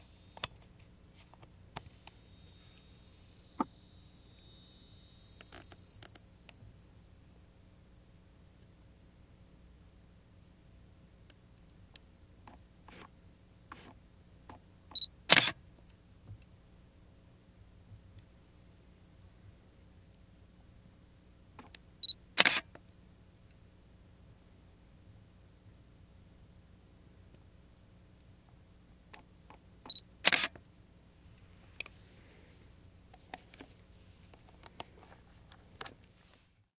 Canon DOS D30 (3x with flash)
A Canon DOS D30. This is a nice digital camera.
Auto focus sounds two beeps and SLR shutter click. Three shots with flash on. I'm pretty sure this camera is making these sounds naturally (mechanically) and the shutter click is not synthesized.
This is a similar sound to "Canon DOS D30 (single)" but with the high pitched flash. Notice how the flash sound stops when the auto focus servo engages.
AKG condenser microphone M-Audio Delta AP